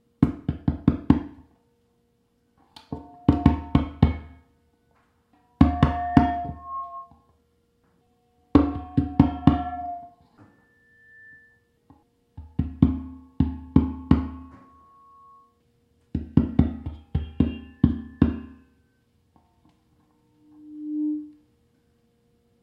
PA microphone feedback (1) tapping
This is the "cliche" sound of someone tapping on a public address microphone before speaking.
I used an EV635 microphone hooked up to an amp. The PA speaker is positioned incorrectly behind the microphone, the volume is too loud, the EQ is off and the room is live - so there's a ringing or feedback.
I took the AKG condenser microphone out of the audio booth and brought it into the studio to record the amp set-up. Encoded with M-Audio Delta AP
feedback, microphone, noise, pa, tapping